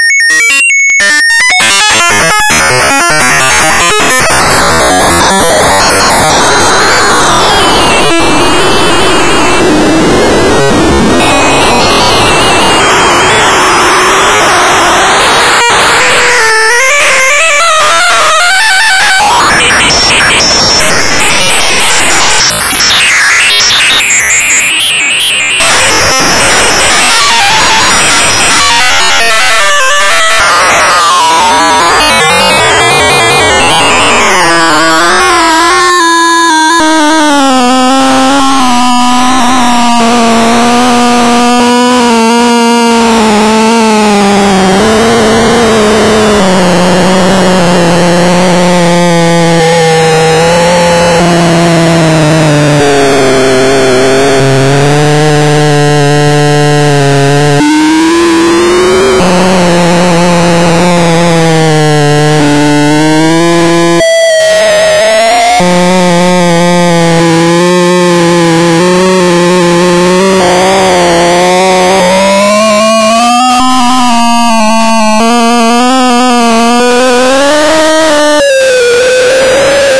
glitchy modem-type noises #1, changing periodically a bit like sample and hold, random walk through a parameter space, quite noisy. (similar to #6). these sounds were the results of an experimental program i wrote to see what could be (really) efficiently synthesized using only a few instructions on an 8 bit device. the parameters were randomly modulated. i later used them for a piece called "no noise is good noise". the source code was posted to the music-dsp mailing list but i can't find it right now.
noise, noisy, glitch, synthesized